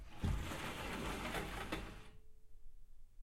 SLIDING DOOR OPENING 1-2
Sliding Door Open
Sliding, Open, Door